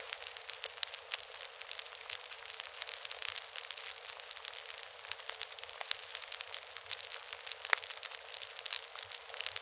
Rice Krispies Bcl 2
cereals cracking in milk